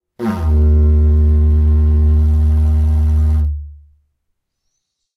Raw audio of my attempt at playing a didgeridoo. This was the cleanest sustain I managed to produce. The note is pitched around E-flat/D-sharp.
An example of how you might credit is by putting this in the description/credits:
The sound was recorded using a "H1 Zoom recorder" on 20th July 2017.
didgeridoo, didjeridu, australian, australia